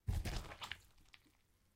Some gruesome squelches, heavy impacts and random bits of foley that have been lying around.